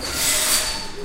In a three-bay oil change shop. A really nasty metal screech, which unfortunately tails into some spoken word, so there isn't a good reverence. That could be added, though.